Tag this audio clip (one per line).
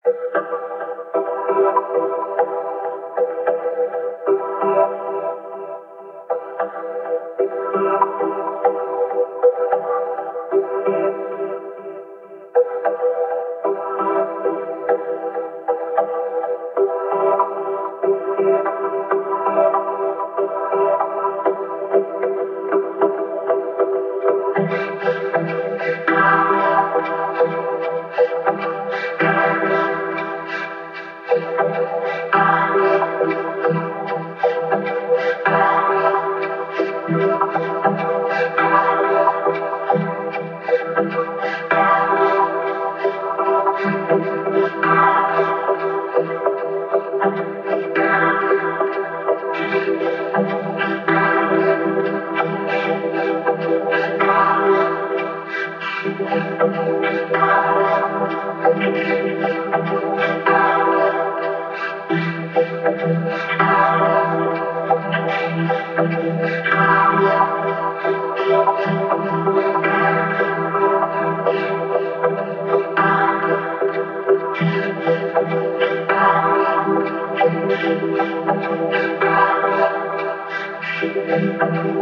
aggressive
big
bright
calm
chaotic
confused
dark
Different
discovery
disturbing
Efx
FX
Nature
Sound
Soundtrack
Space
Strange
Transformational
Ufo
Unique
Universe
Weird